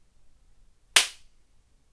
Slap to the Face
A hard slap sound
Attack, Face, Fight, Hit, Slap